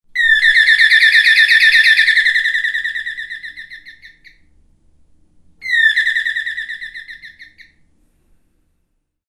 door bell bird
bell, door